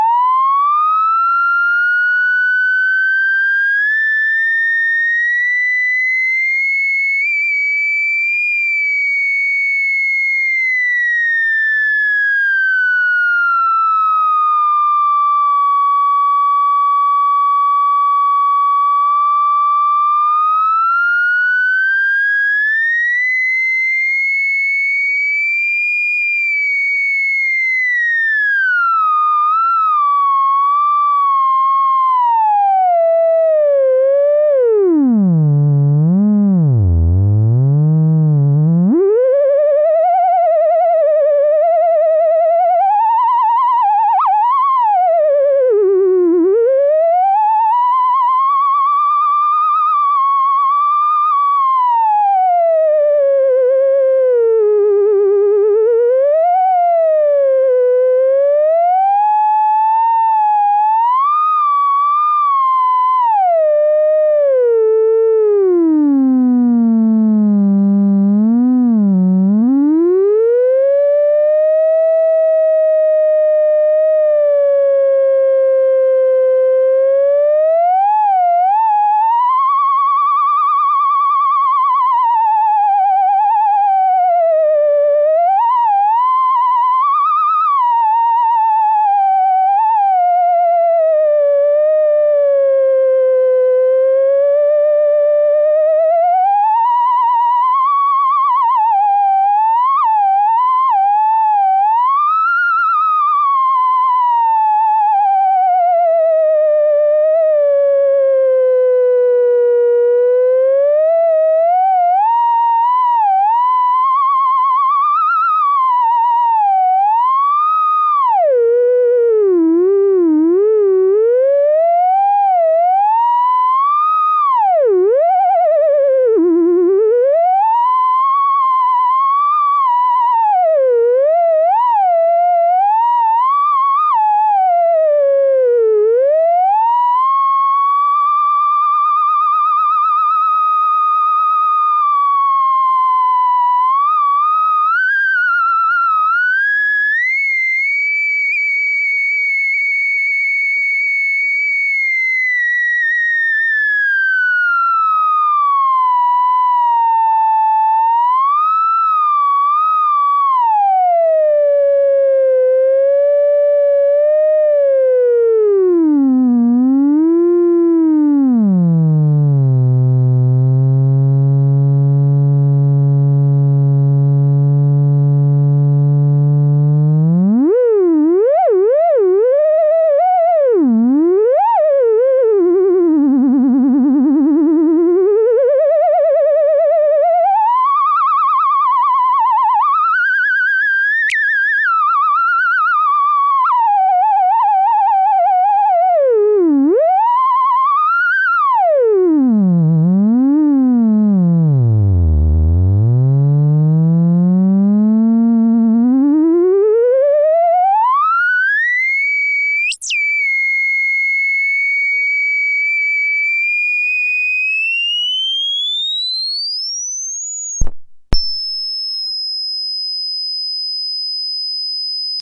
Theremin alone
My old mate Simon Taylor bought a theramin to use on stage and I borrowed it one weekend to make some spooky noises for Ad Astral Episode 2 "RITA" in which Commander Xyton reveals his true form. Very 1950s Sci Fi B-movie ;-)
sounds, spooky, theramin